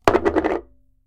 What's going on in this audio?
wooden object set on table 6
Placing a wooden bowl onto a wooden surface. Recorded with an ME66 and M149.